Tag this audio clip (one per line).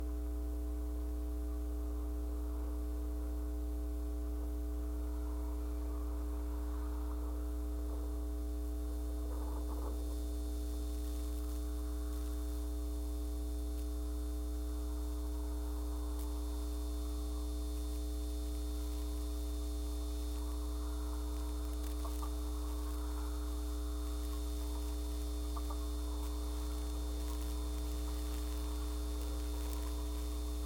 rising-intensity
mains
contact
field-recording
DYN-E-SET
PCM-D50
power-hum
contact-microphone
Sony
wikiGong
water-valve
mic
contact-mic